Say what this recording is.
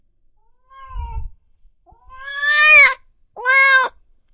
kitten meow evil really cool
evil, kitten, meow